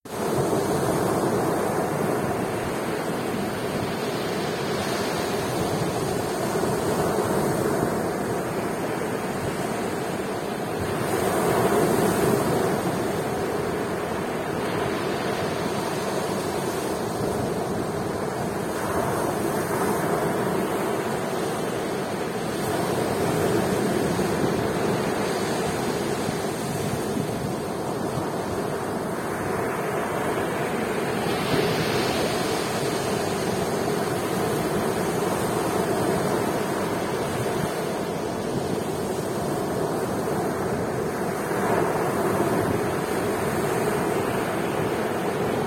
beach waves come up light wind noise
I'm not a sound pro. If you do not want the wind noise on the microphone, it is stereo so you can remove the "windy" track if you do not want it.
Enjoy and go create something fabulous!
not a music pro. all tracks recorded with just a smartphone and uploaded raw. use for whatever you want. enjoy!
beach, sea, waves, wind